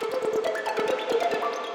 Aalto Eastern Sequence
Eastern-inspired synth sequence. made using Aalto (Computer Music Edition) VST plugin. Running FL Studio as a host.
Ethno, Sequence, Arpeggio, Monophonic, Eastern, Aalto, Electronic, Arabic, Buchla